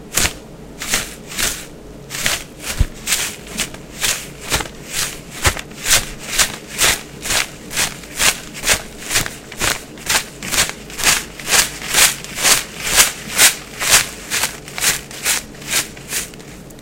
Grass Footsteps
Recorded myself shaking a bag of pretzels with the salt still in.
Foliage, Footsteps, Grass